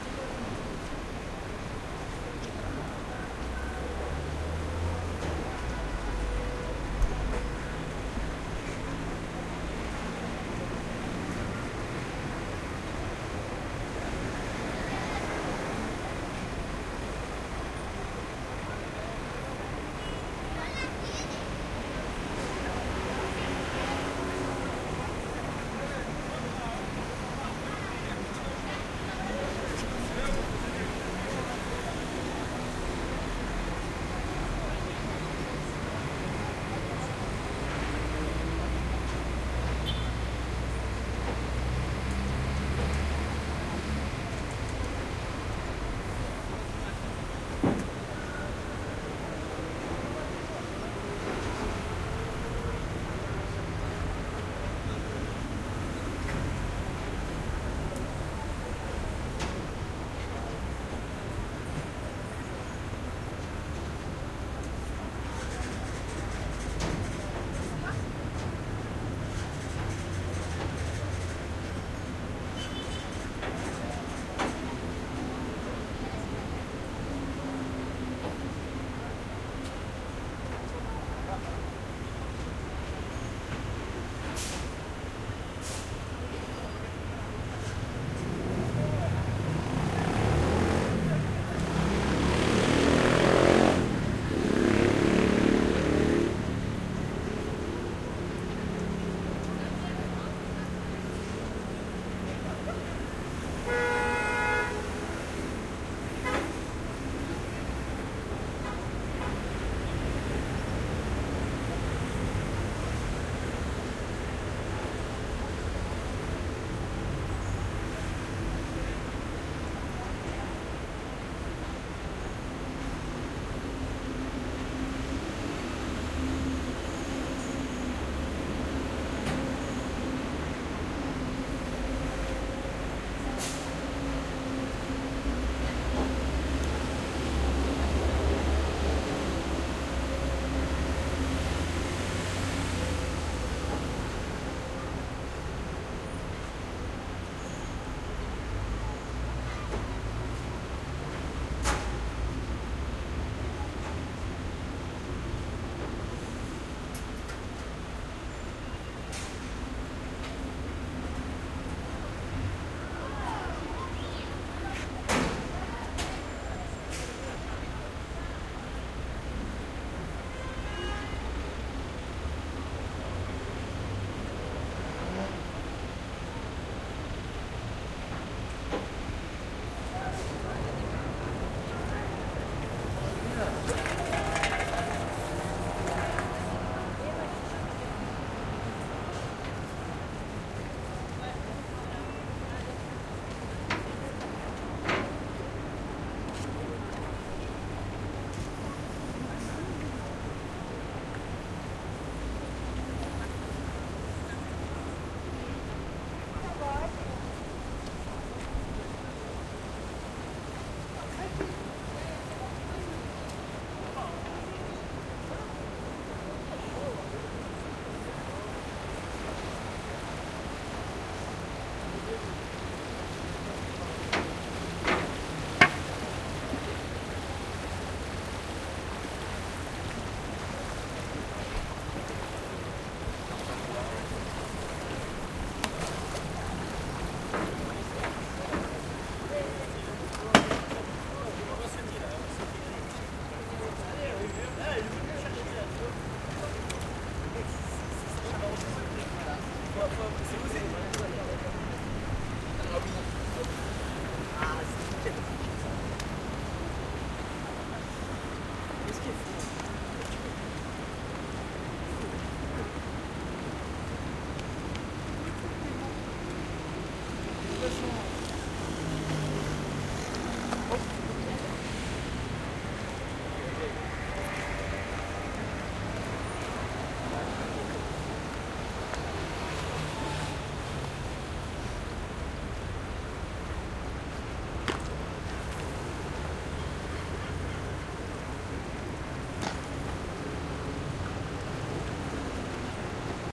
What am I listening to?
traffic light mopeds echo people before bridge Marseille, France MS
Marseille, light, traffic, mopeds